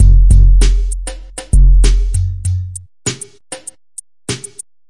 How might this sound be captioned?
Drumloop A new age starts Zlow break - 2 bar - 98 BPM (no swing)
breakbeat, break, beat, groovy, drum-loop